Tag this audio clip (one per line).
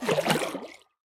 zoomh4
river
splash
water
field-recording
lake